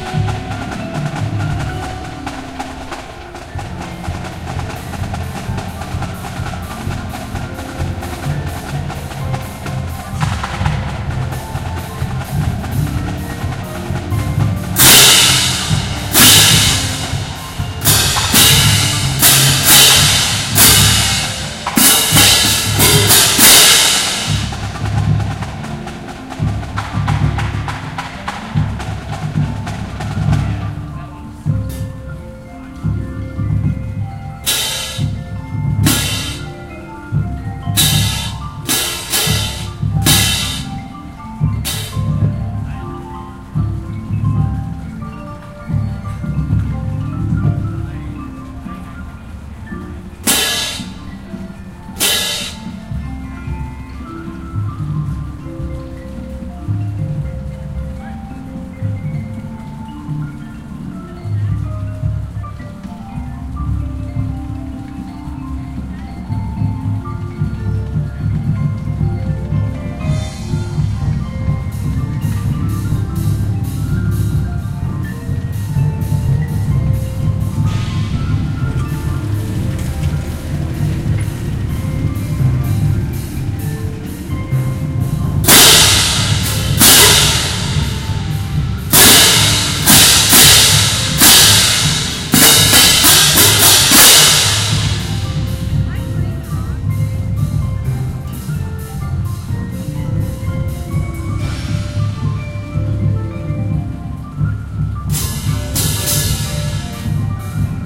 Noisy Xylophone, Snare and Cymbal Ambience
Xylophones, cymbals and snares practicing.
percussive, noisy, snare, music, cacophonous, band-practice, band, ambience